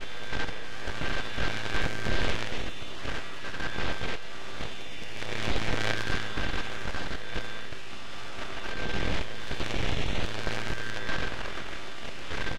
Static interference
Sample of a disturbed radio signal. Sample generated via computer synthesis.
Broadcasting, Communication, Crackle, Distorsion, Radio